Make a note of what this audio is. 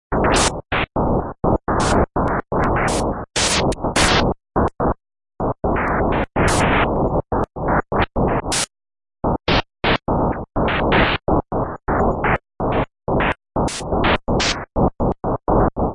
A lot of insane noise that could almost make a ridiculous rythm
insane, intense, ridiculous, static